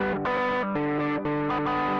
Randomly played, spliced and quantized guitar track.
buzz, gtr, guitar